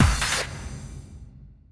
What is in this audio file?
OAI-DE
An excerpt from one of my dubstep tracks.
FL Studio.
fl-studio, fruity-loops, sequence, short, excerpt, drums, dubstep, short-sequence, sample